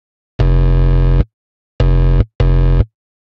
A distorded square wave that simulate static noise.
distortion, current, amplification, square, noisy, wave, noise, amp, simu, simulation, electro